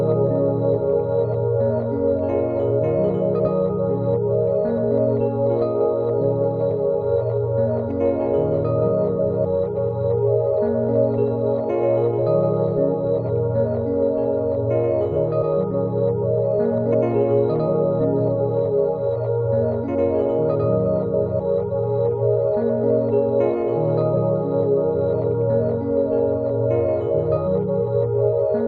Driving at night through the abandoned city. Something follows, glowing. Did you just win the game or what?
ambient, atmosphere, cinematic, loop, mysterious, quiet, relaxing, rhodes, soundtrack